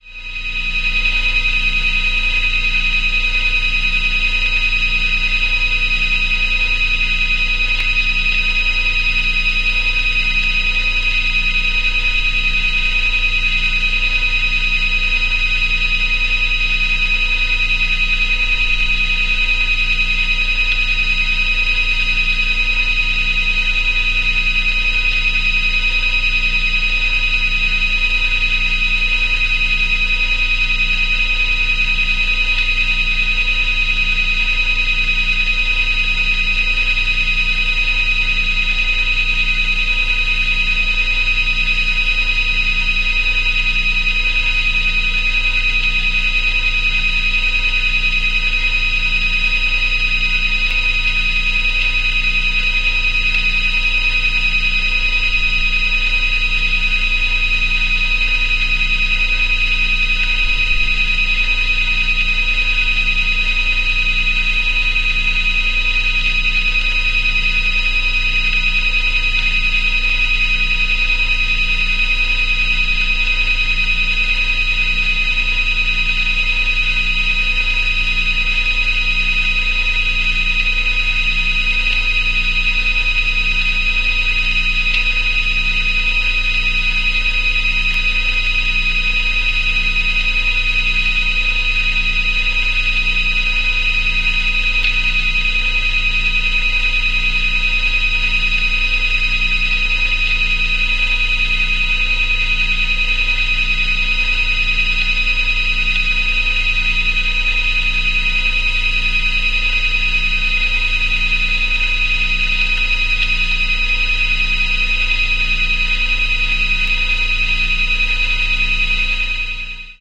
Contact microphone recording of a Pfeiffer turbomolecular vacuum pump
Mechanical, Machinery, Pump, Mono, Industrial, Contact, Vacuum